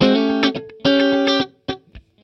A7th 108bpm
Clean funky guitar at 108bpm. Strat through a "Fender Twin" miked with an SM58. Bridge+Middle pickup.